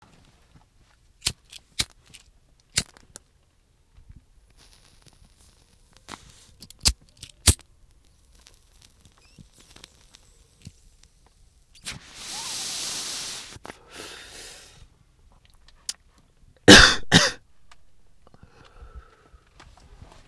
smoking in car.
smoking, car